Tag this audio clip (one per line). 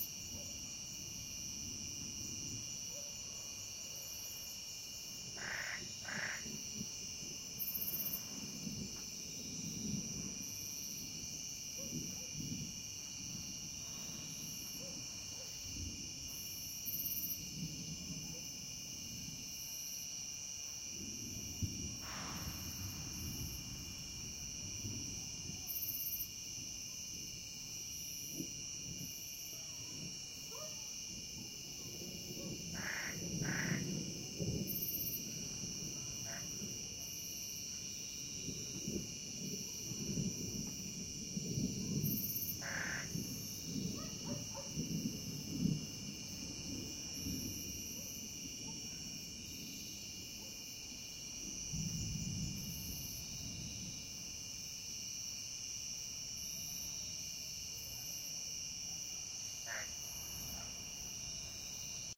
dogs
storm
jungle
toad
mexico
Village
field-recording
cicada
voices
thunder